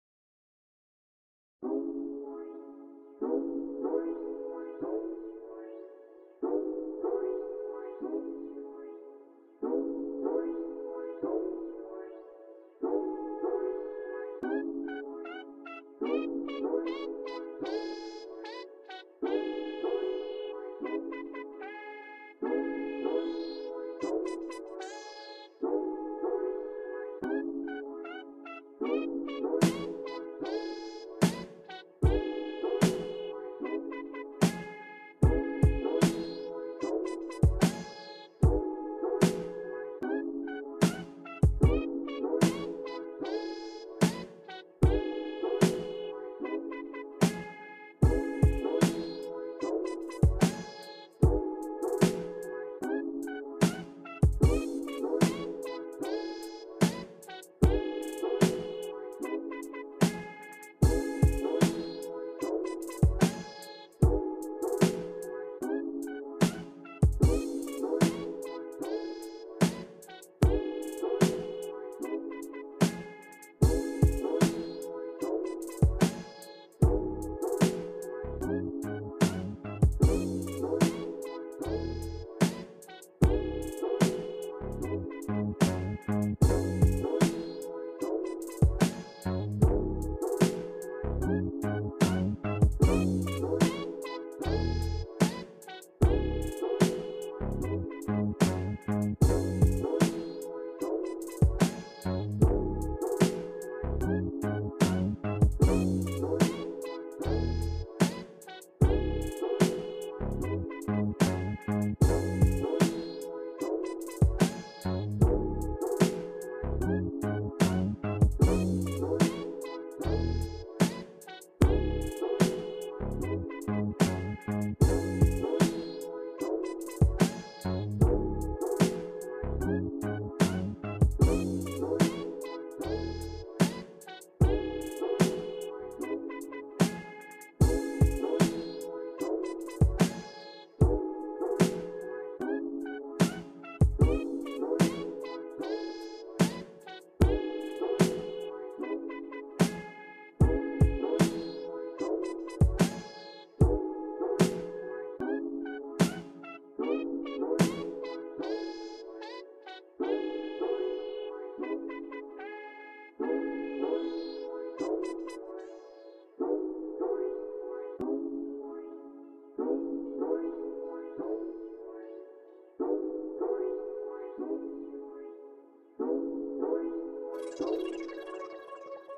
Beach drive
A layed back casual soundtrack